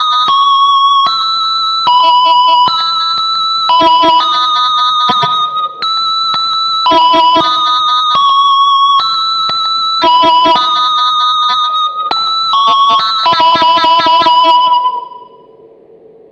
melody dist guitarish 114bpm d
synth melody through a guitar gt6 multi fx variations
guitar-multi-fx, synth, melody